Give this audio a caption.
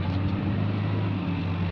Engine rising and falling loop
Recording of a construction vehicles engine that has been processed in audacity using EQ to filter out unwanted frequencies.
engine, field-recording, Sound-design